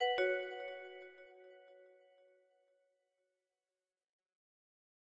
Short sound to inform customers via the public announcement speaker system.
announcement, attention, call, sound, speaker